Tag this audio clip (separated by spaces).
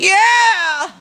vocalization random male